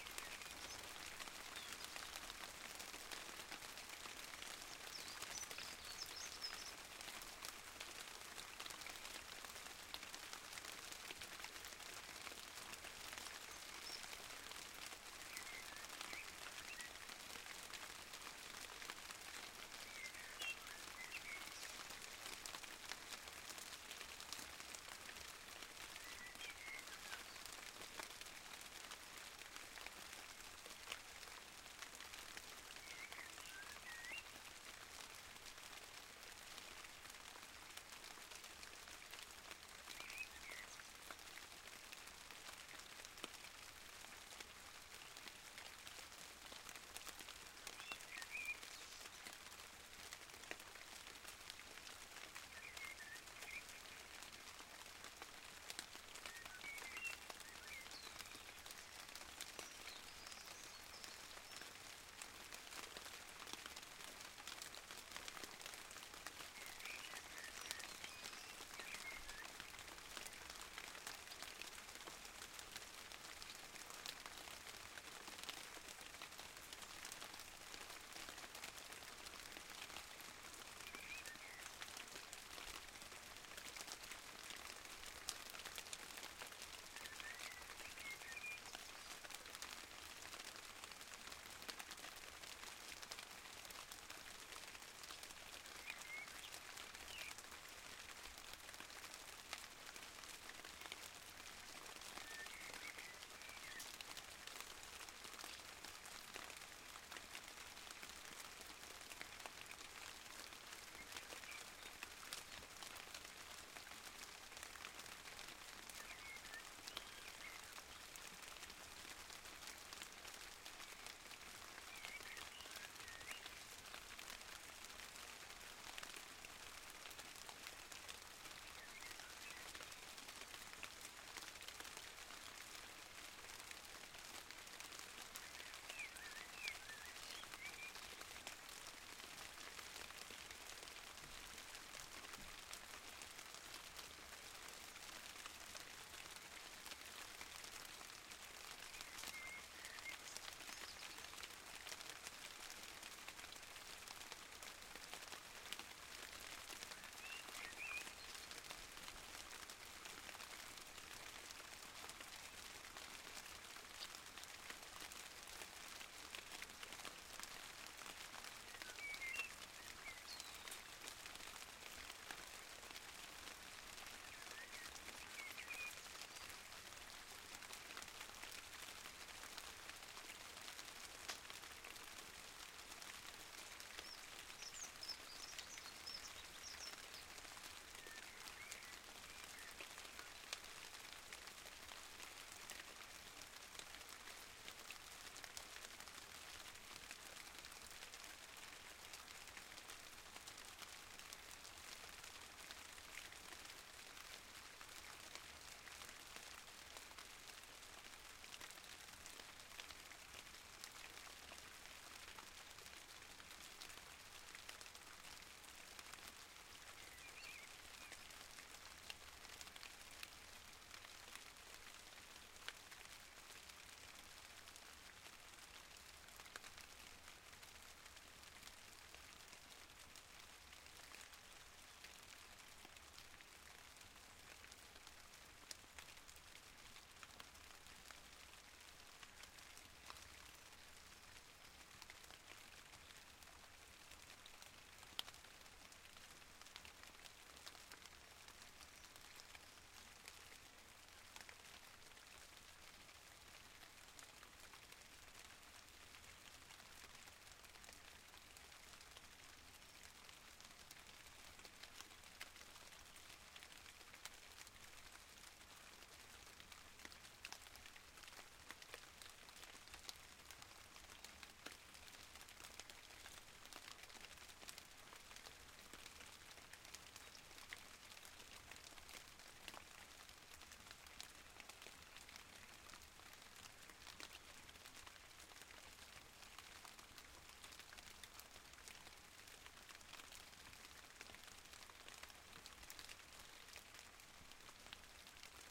This is the recording of some scottish rain, using an AudioTechnica microphone AT835ST, a Beachtek preamp and an iriver ihp-120 recorder. The microphone was set on the stereo narrow mode, which I haven´t used since. It all sounds pretty relaxing!